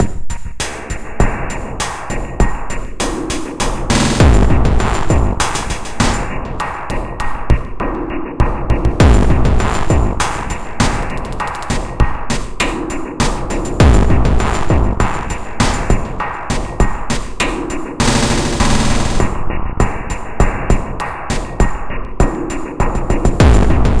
100 comin up 2
A really slow, fatass breakbeat at 100bpm.
beat, gabber, trip-hop, loop